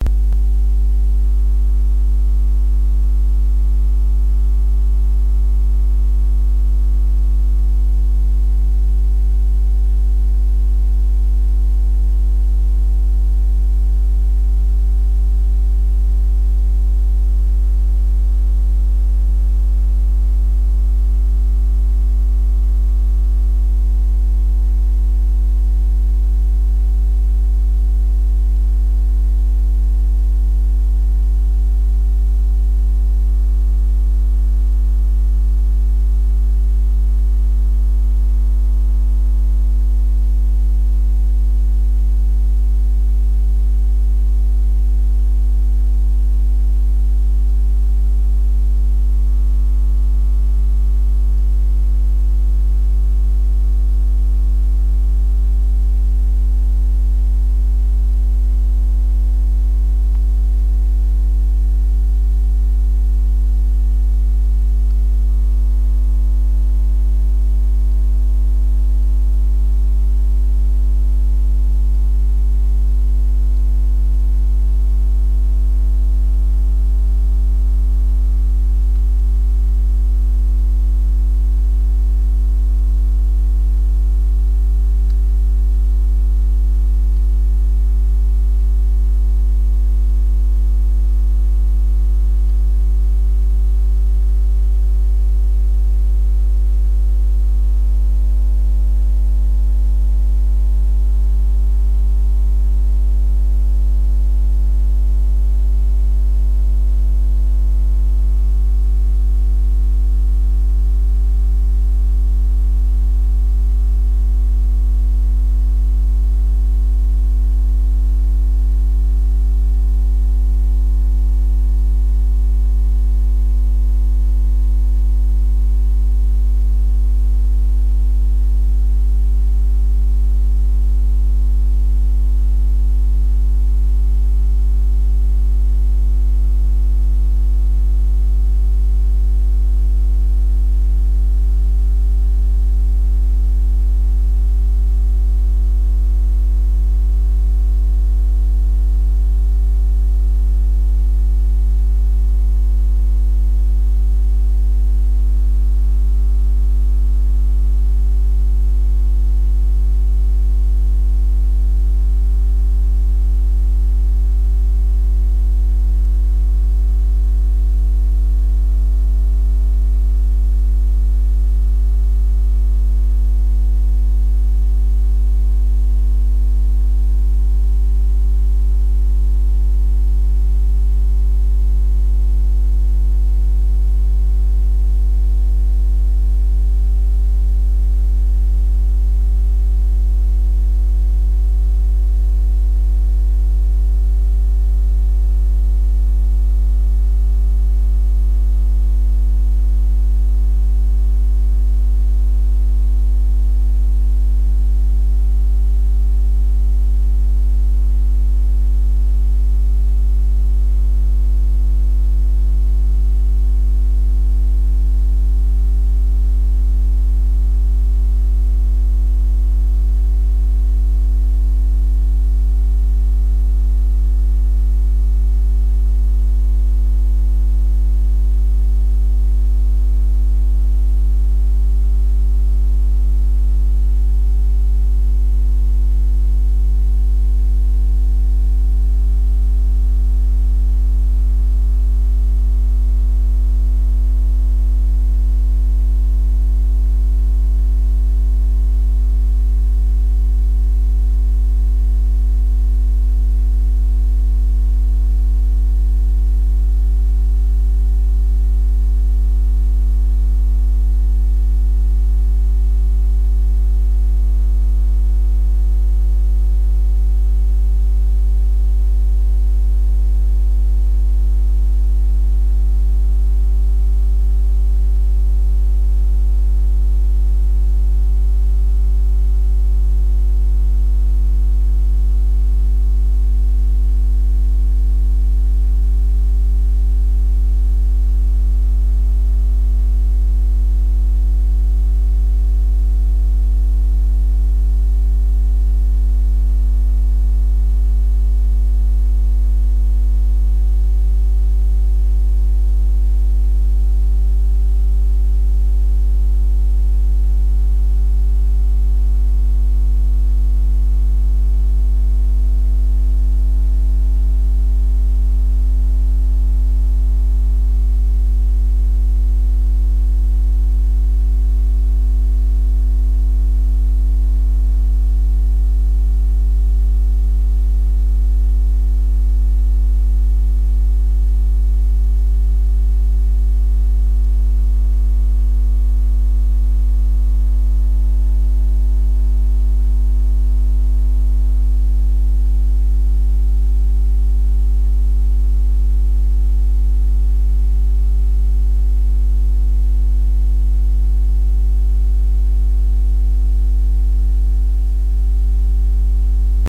ECU-(A-XX)82
Engine Control Unit ECU ATV Trail Running Type Triphase Fraser Lens Raspberry Amstrad CPC Chipset Tune Echo Wavelength Iso Synchronous Whirlpool Power Battery Jitter Grid Way ICU Ad Hoc
Ad, Amstrad, ATV, Battery, Chipset, Control, CPC, Echo, ECU, Engine, Fraser, Grid, Hoc, ICU, Iso, Jitter, Lens, Power, Raspberry, Running, Synchronous, Trail, Triphase, Tune, Type, Unit, Wavelength, Way, Whirlpool